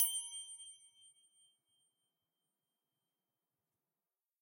Softer wrench hit A#4
Recorded with DPA 4021.
A chrome wrench/spanner tuned to a A#4.